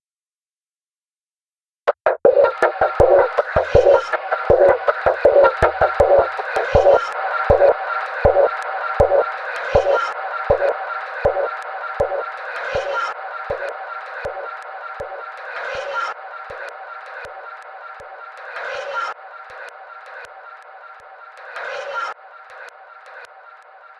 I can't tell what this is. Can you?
Flower loop 80 bpm 1
Since I really liked his description I had to pay him an honour by remixing this samples. I cutted up his sample, pitched some parts up and/or down, and mangled it using the really very nice VST plugin AnarchyRhythms.v2. Mastering was done within Wavelab using some EQ and multiband compression from my TC Powercore Firewire. This loop is loop 1 of 9.